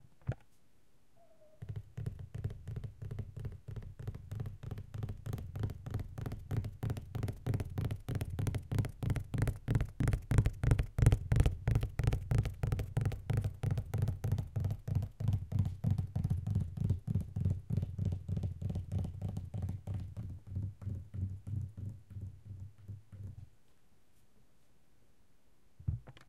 Galloping Fingers
Fourfinger galloping on tabletop